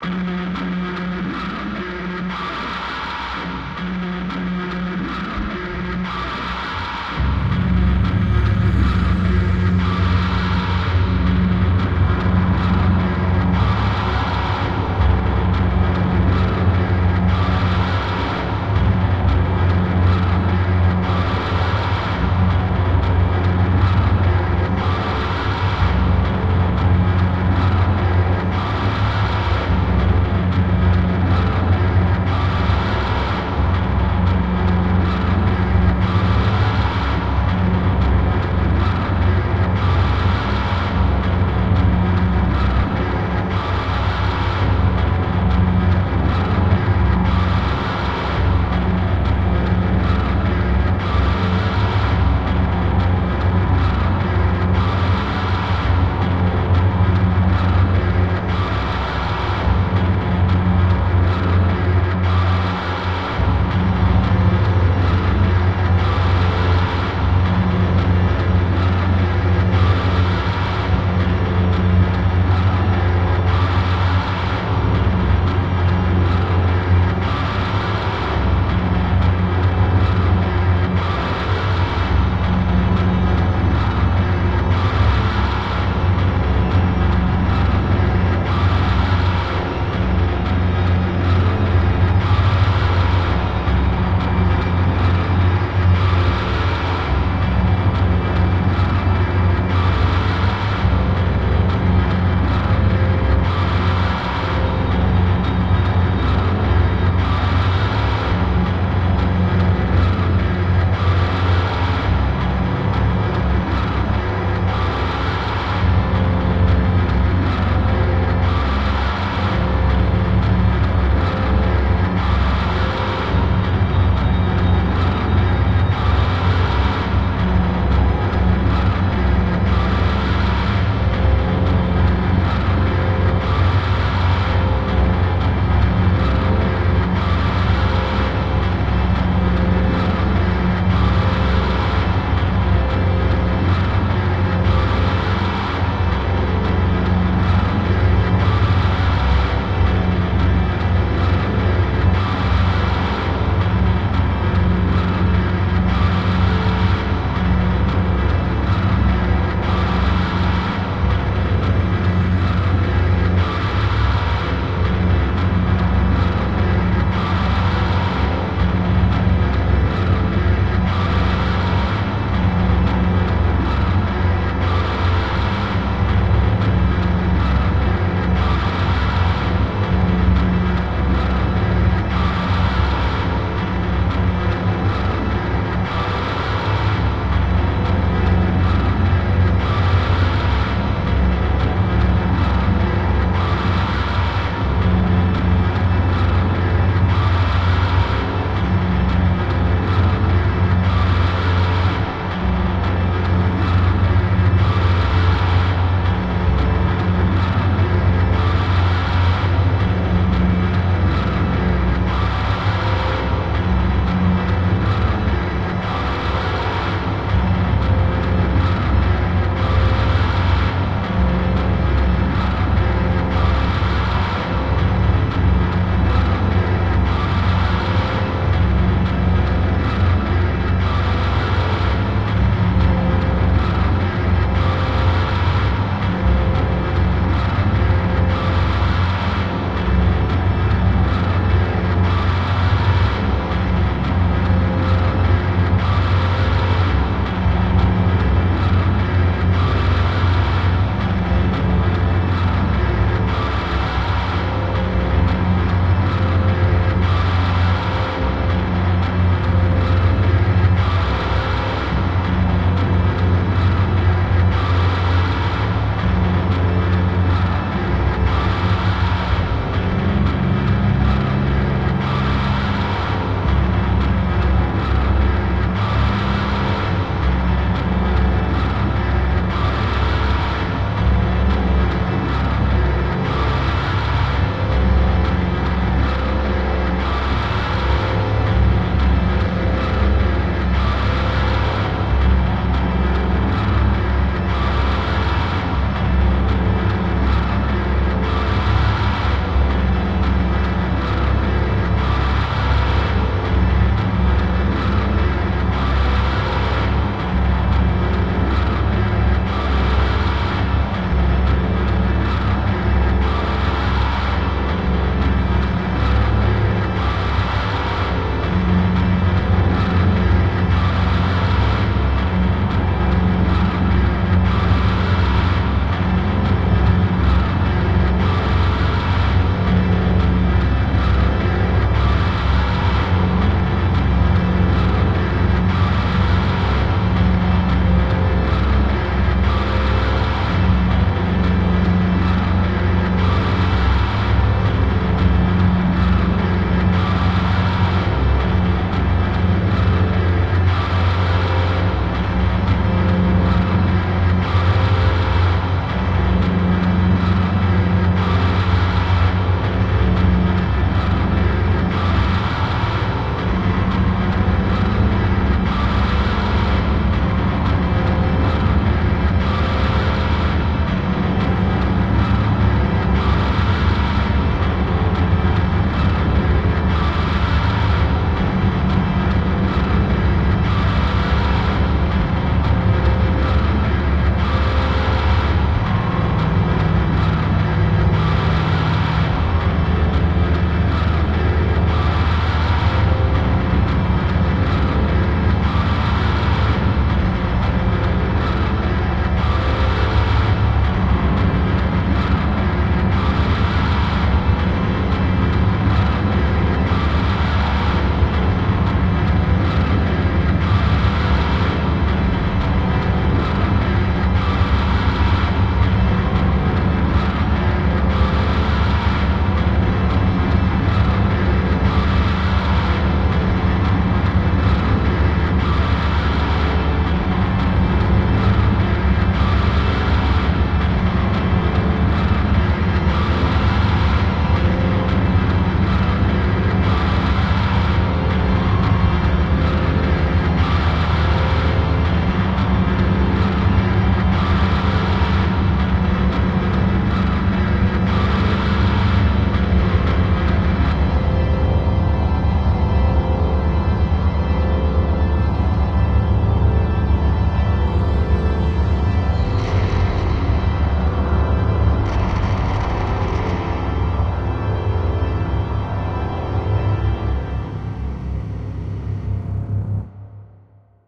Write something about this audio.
stamp loop2 by Lisa Hammer
This is a great soundscape for a horror film or post-apocalypse scene. I recorded a stamp machine at the post office then added layers of creepy drones. The listener is meant to be put on edge from the grating sounds, but some people might find that it puts them into a trance.
horror, macabre, spectre, phantom, sinister, terrifying, suspense, Gothic, fear, haunted, spooky, ominous, shady, drone, dramatic, scary, frightful, terror, soundtrack, thrill, imminent, soundscape, dark, creepy, drama, anxious, film, phantasm, deep